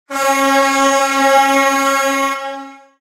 S025 Airhorn Deep Sound Mono
Blast from an airhorn, deep sounding
Crowd, Airhorn, Long-blast